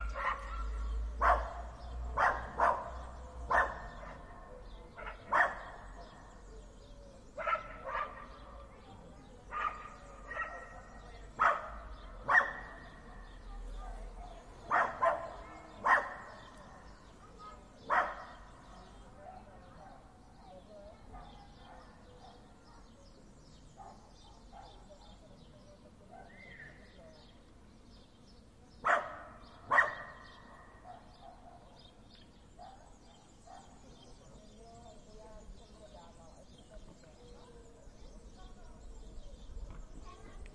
Two small dogs barking at each other, with some bird chirping and indistinct chatter of people in the recording too. A Sunday afternoor in spring in a small town.